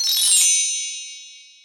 SE refresh
twinkle, refresh